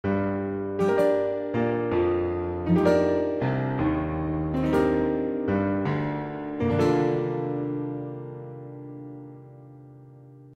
An "official" sounding piano little riff. You can use it wherever you want!